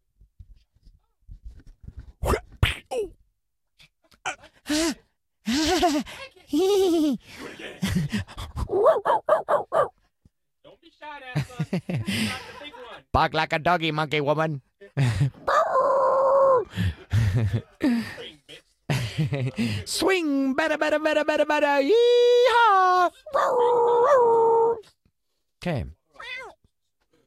another clipping from professional recordings of famous vocal artist Luke Michaels
clip, funny, male, sfx, vocal